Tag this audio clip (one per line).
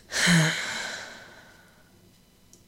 female
voice
speech
human
girl
sigh
woman
breath
vocal
breathe
reaction